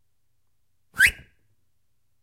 Cartoon-y slip sound effect. Made when I discovered that sliding lid tabs in when closing product boxes at work could make a whistle sound!
Tascam-DR40 Onboard Mic + Adobe Audition edit.
cartoon, humor, slip, fx, comic, sound-effect, cartoon-sound